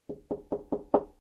Knocking on the door
The sound of a knock on the door.
Knocking, knock, indoor